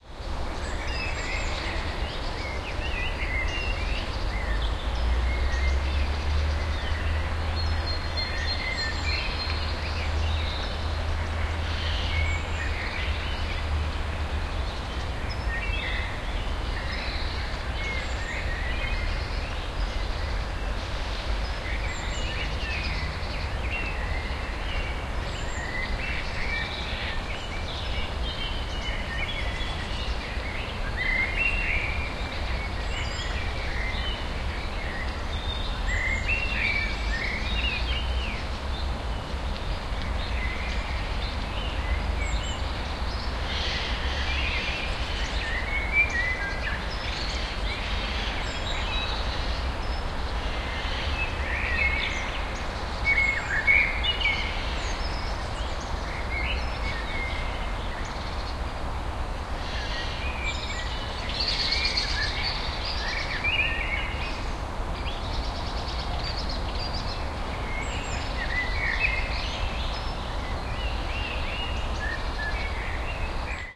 in the park1
Background noises from a municipal park, bird noises and distant car noises. This was recorded on MD with two Sennheiser ME 102 mic capsules worn as binaural microphones.